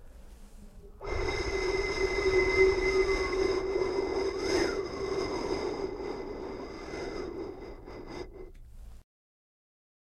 Barulho de uma pessoa assoprando dentro de um recipiente, em que, o buraco de entrada e saída do ar é pequeno.